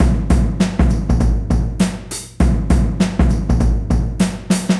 Very Heavy Kick Drum Loop (Hip Hop) 100 bpm.
loop, rhythm, drums, urban, drum-loop, new-york-city, percussion-loop, beat, 100-bpm, street